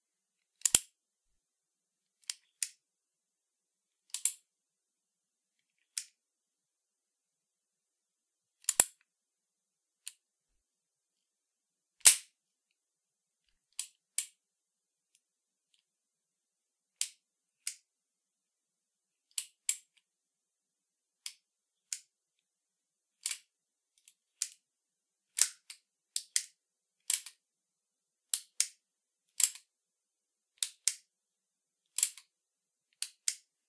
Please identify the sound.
Random clicks from a Colt 45 replica.